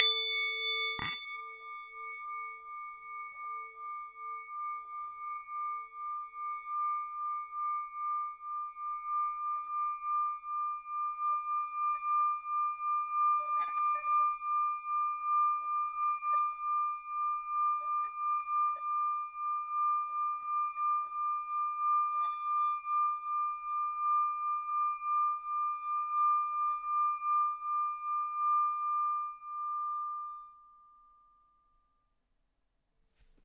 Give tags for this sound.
singing
bowl

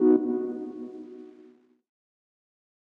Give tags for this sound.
chord
win
game
notification
success
synth

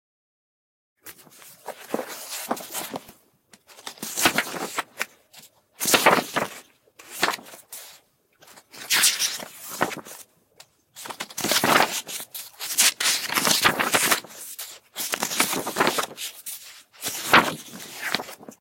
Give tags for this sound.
action
book
books
flick
flip
foley
magazine
newspaper
page
pages
paper
read
reading
scroll
short
sound
turn
turning

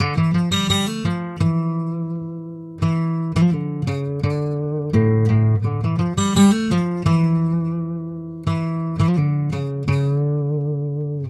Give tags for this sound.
acoustic
arabic
dark
guitar
hip-hop
live
music
pluck
real
steel
steel-string
string
strum
trap
world

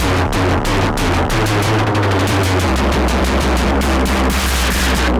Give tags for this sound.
sliced
experimental
glitch
acid
drums
electro
drumloops
rythms
breakbeat
processed
hardcore

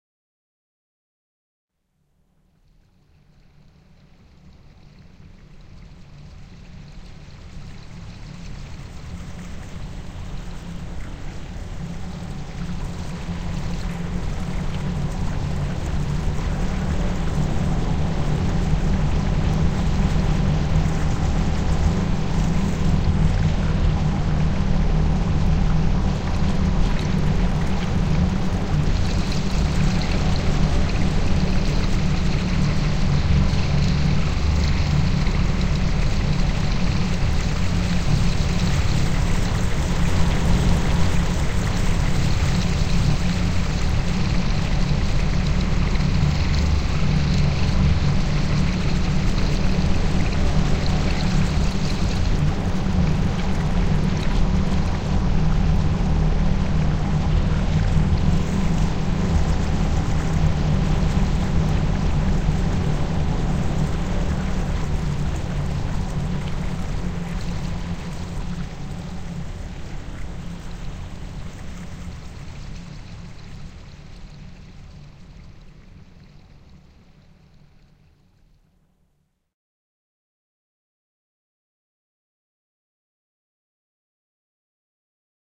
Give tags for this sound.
artificial-space
droplets
soundscape
synthesis
water

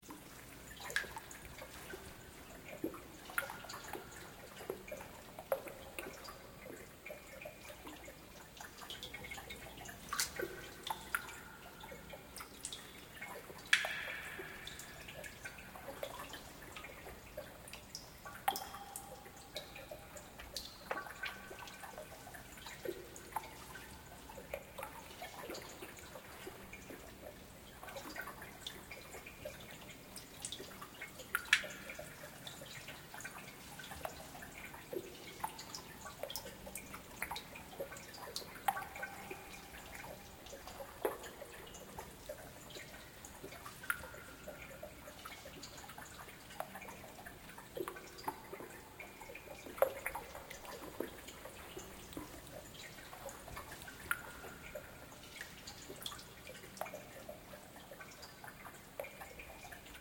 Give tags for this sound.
loop
Ambience
Sound-Design
Ambient
Sewer
Cave
Environment
Amb
mono

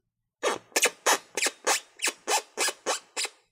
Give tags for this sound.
cartoon
cartoon-sound
comic
squeak

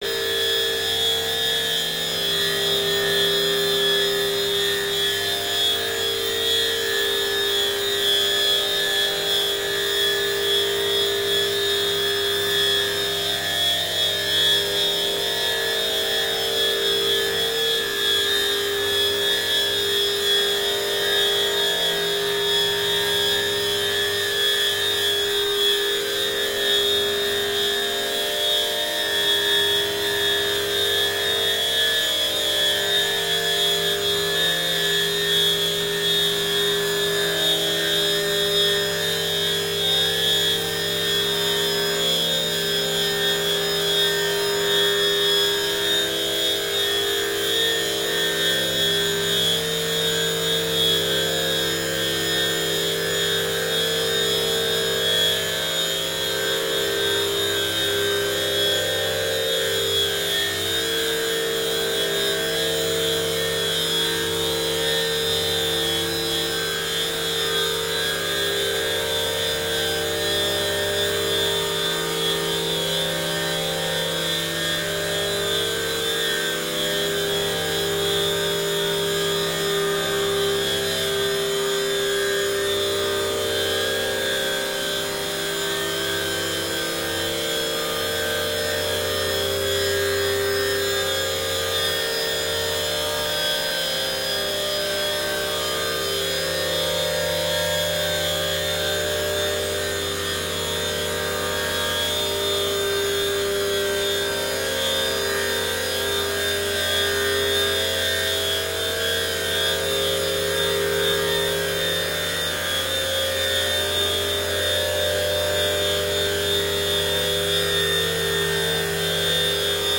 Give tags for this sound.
chimes
scratchy

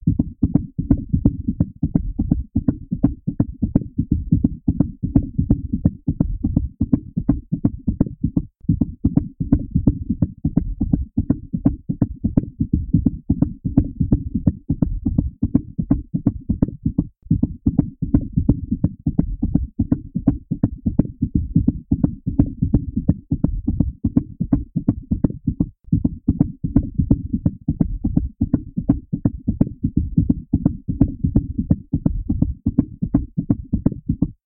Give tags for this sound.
heart
heartbeat
heart-beat

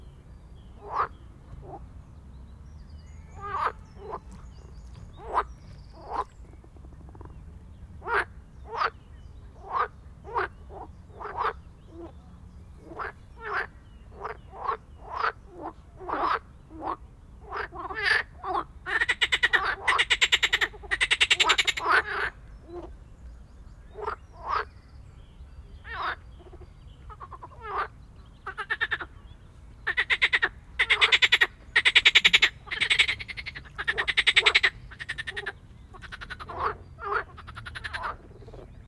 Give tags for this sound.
frog
field-recording

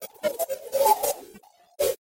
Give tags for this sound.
audio
photo

